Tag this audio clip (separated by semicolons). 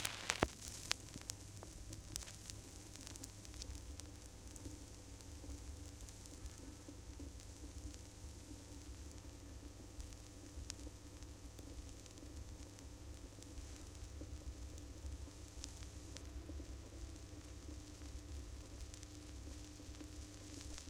Noise; Hiss; Vinyl